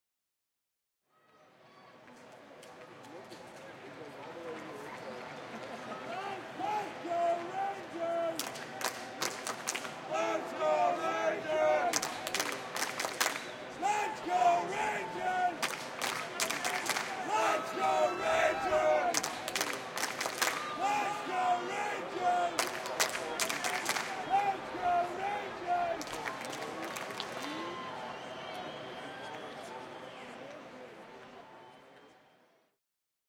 WALLA Ballpark Let's Go Rangers
This was recorded at the Rangers Ballpark in Arlington on the ZOOM H2. Crowd chanting, "Let's Go Rangers!"
crowd, sports